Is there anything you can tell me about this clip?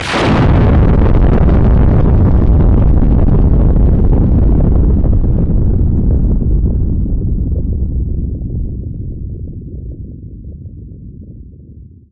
Thunderclap. Made on a Waldorf Q rack.
waldorf, threshold-rumble, synthesizer, thunder, atmosphere, weather, blast, explosion